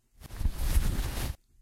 Item Received
Rummaging through your inventory bag.
bag, inventory, satchel